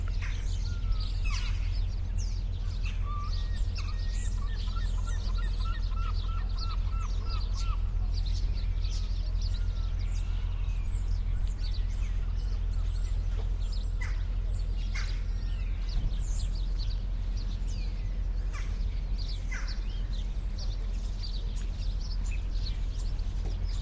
This is what you can call a short and snappy bit of field-recording. Only a couple of seconds at Nairn marina. Sony PCM M10 recorder with Rycote windshield.